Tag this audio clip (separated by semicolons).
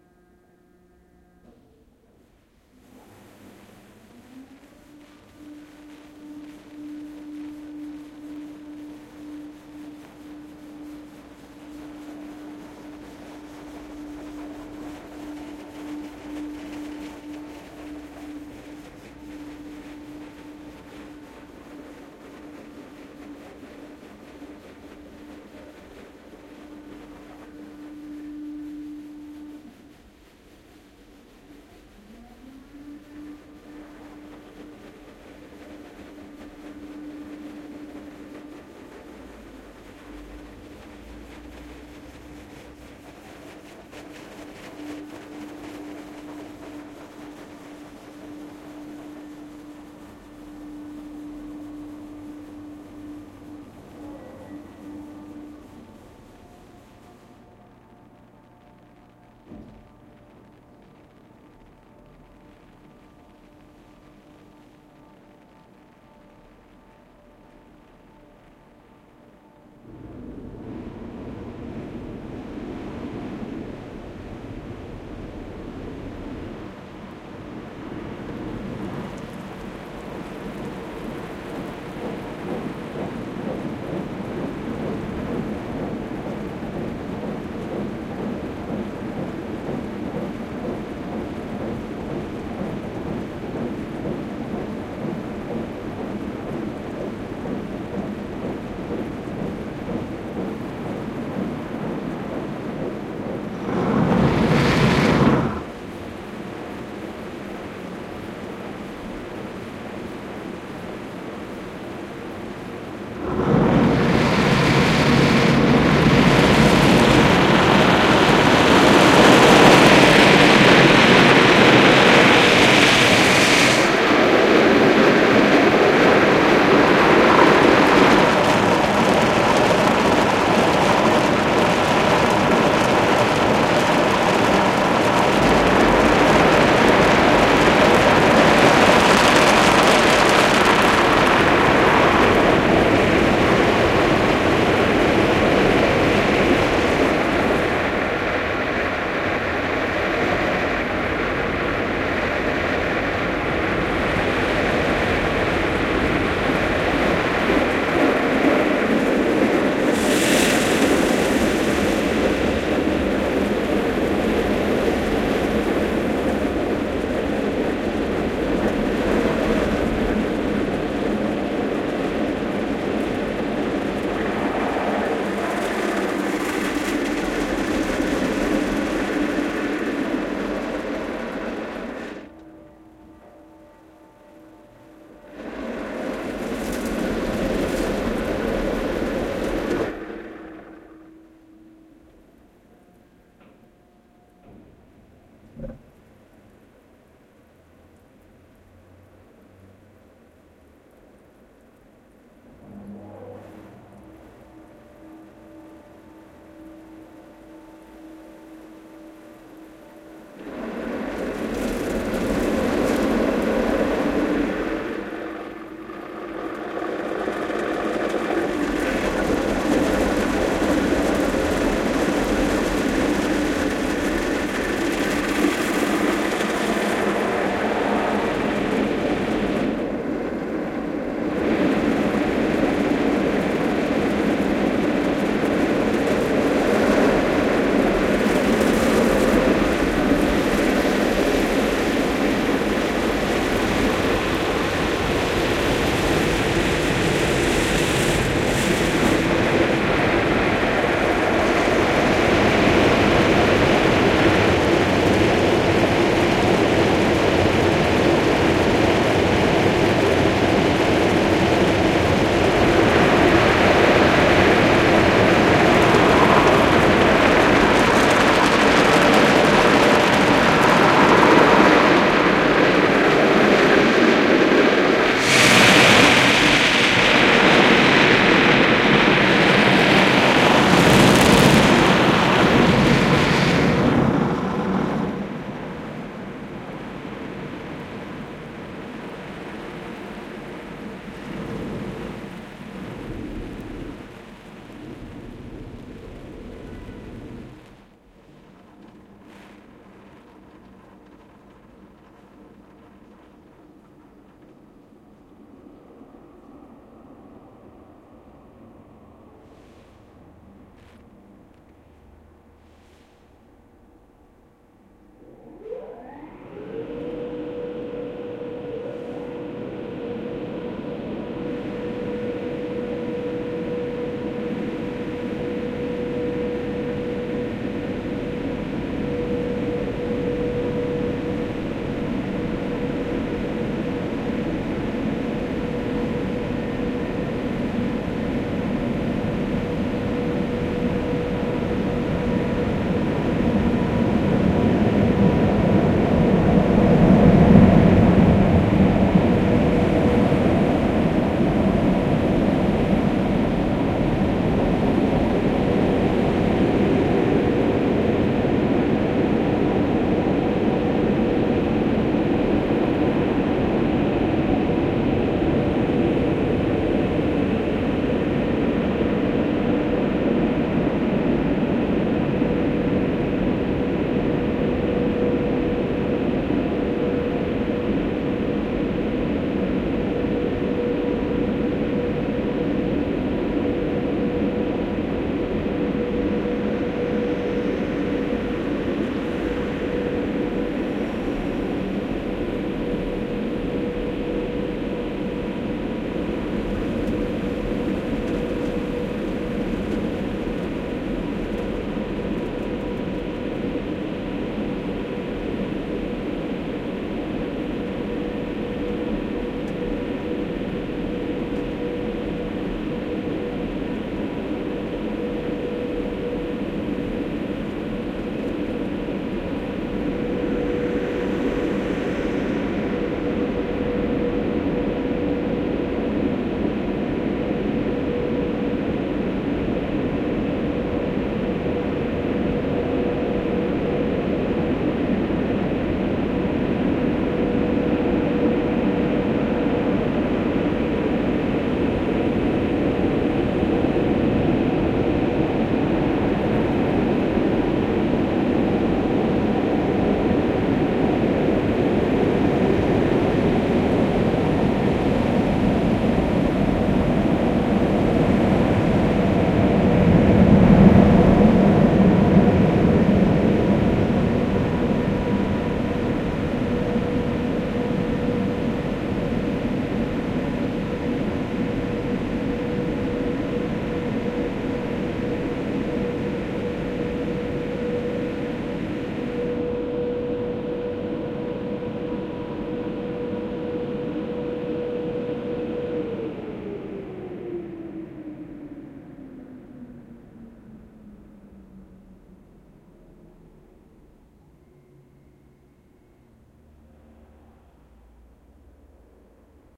machine; field-recording; industrial